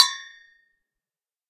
Gong - percussion 04
Gong from a collection of various sized gongs
Studio Recording
Rode NT1000
AKG C1000s
Clock Audio C 009E-RF Boundary Microphone
Reaper DAW
bell, chinese, clang, drum, gong, hit, iron, metal, metallic, percussion, percussive, ring, steel, temple, ting